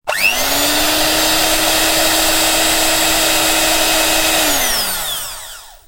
BEAT09MT
A sample of my Sunbeam Beatermix Pro 320 Watt electric beater at high speed setting #4. Recorded on 2 tracks in "The Closet" using a Rode NT1A and a Rode NT3 mic, mixed to stereo and processed through a multi band limiter.
appliance, beater, electric, kitchen